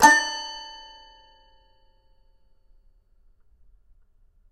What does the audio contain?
Toy records#03-D3-03
Complete Toy Piano samples. File name gives info: Toy records#02(<-number for filing)-C3(<-place on notes)-01(<-velocity 1-3...sometimes 4).
studio, instrument, instruments, sample, toy, toypiano, toys